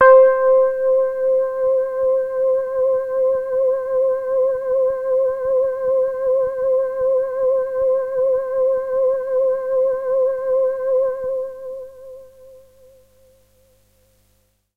Noisy Mellowness - C5
This is a sample from my Q Rack hardware synth. It is part of the "Q multi 007: Noisy Mellowness" sample pack. The sound is on the key in the name of the file. The low-pass filter made the sound mellow and soft. The lower keys can be used as bass sound while the higher keys can be used as soft lead or pad. In the higher region the sound gets very soft and after normalization some noise came apparent. Instead of removing this using a noise reduction plugin, I decided to leave it like that.
synth, mellow, multi-sample, waldorf, soft, bass, electronic